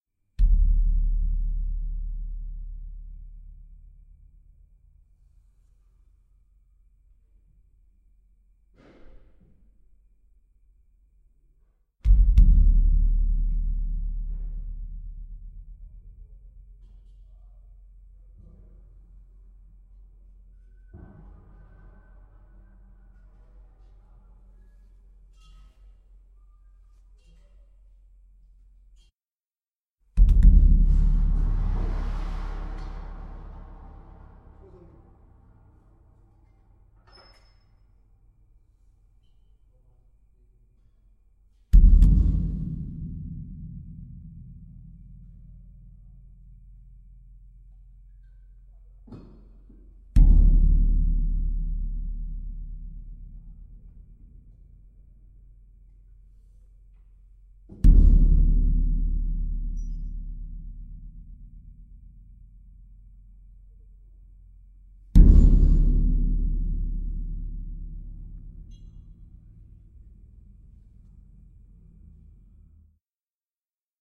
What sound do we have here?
Percussive sounds recorded by hitting with the hands a steel plate, part of the exhaust of a giant engine.
cinematic, dark, deep, gloomy, machine, mistery, movie, percussion, plate, steel, steeldrum